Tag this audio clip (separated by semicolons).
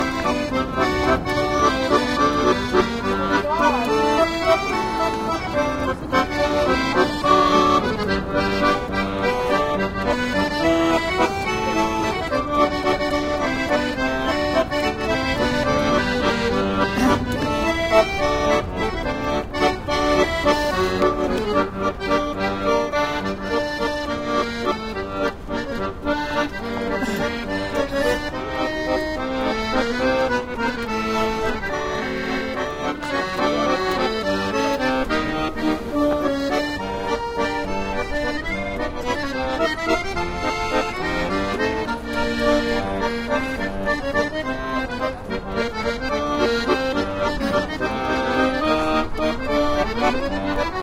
accordion; folk; street-music